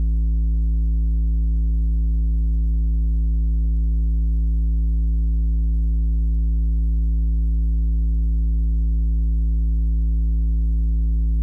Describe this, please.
Doepfer A-110-1 VCO Sine - A1
Sample of the Doepfer A-110-1 sine output.
Captured using a RME Babyface and Cubase.
A-100, A-110-1, analog, analogue, basic-waveform, electronic, Eurorack, modular, multi-sample, oscillator, raw, sample, sine, sine-wave, synthesizer, VCO, wave, waveform